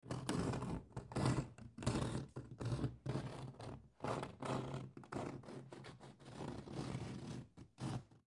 Wood Surface Scratching 1 4
Scrape, Recording, Hit, Hard, Wooden, Light, Sound, Scratch, Desk, Design, Surface, Door, Foley, Knock, Wood, Real